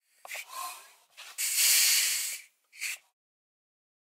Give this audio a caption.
Bicycle Pump - Plastic - Medium Release 03
A bicycle pump recorded with a Zoom H6 and a Beyerdynamic MC740.